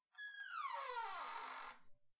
door opening 1
interactions, player, recording